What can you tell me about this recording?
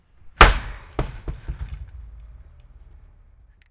Slamming Screen Door
Slamming the screen door on a porch
close, slam, slamming, closing, shut, porch, door, south, screen